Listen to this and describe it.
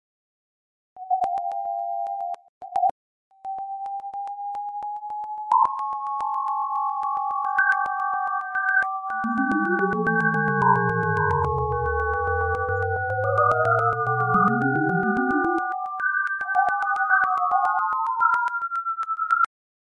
Made from a molecular model of Alprazolam with image synth.